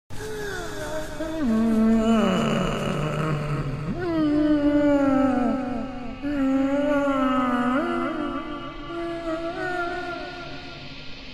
This is a very creepy distant crying. And it's very loud
Creepy, Crying, Distant